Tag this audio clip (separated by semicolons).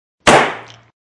bang; bullet-hit; fire; firing; gun; gun-shot; gunshot; pistol; pop; rifle; shoot; shooting; shot; shotgun; weapon